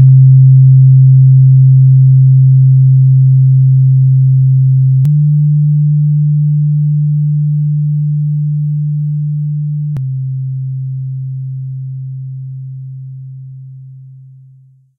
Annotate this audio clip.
For this sample, I have to use a stereo recording. Drum and bass style
I put an effect to melt in closure, and an effect to change the height (-70.000, pitch from D to F), and an other effect to change the height (+ 12.575, pitch from D to E).